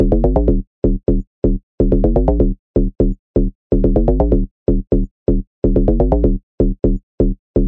LS PTH BASSLOOP 027 125 Bm
house, minimal, techno